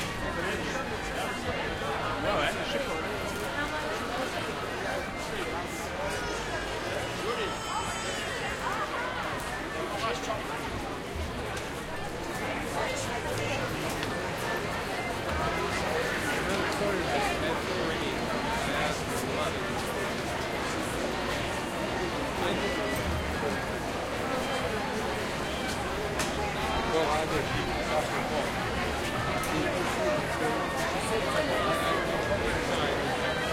crowd ext medium street festival music background MS
crowd, ext, festival, medium, music, street